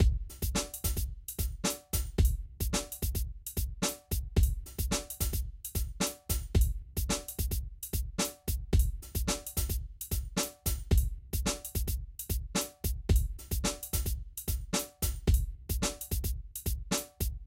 110 escape drums poly
It was made with Polyplex Drums by Reaktor 6. Very easy to layer differnt Sounds to get better results. It´s an acoustic Drumloop at 110 bpm. It was made in Bitwig Studio.
Drums, Hiphop